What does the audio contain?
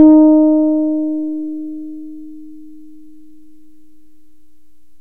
These are all sounds from an electric six string contrabass tuned in fourths from the low A on the piano up, with strings A D G C F Bb recorded using Cool Edit Pro. The lowest string plays the first eight notes, then there are five on each subsequent string until we get to the Bb string, which plays all the rest. I will probably do a set with vibrato and a growlier tone, and maybe a set using all notes on all strings. There is a picture of the bass used in the pack at